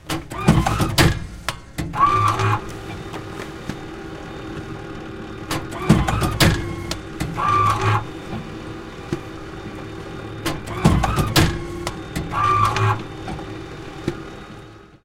Recording of a bookletizer, which is a device that staples and folds paper, making a simple booklet.